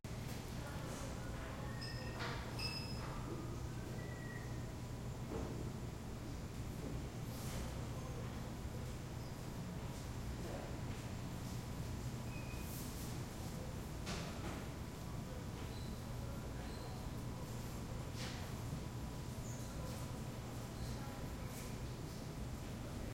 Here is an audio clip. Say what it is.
room tone quiet cafe light ventilation fridge empty some distant staff activity1
cafe, empty, light, quiet, room, tone